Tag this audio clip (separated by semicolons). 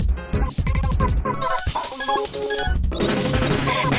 stuff; dnb; house; drums; beats; processed; lockers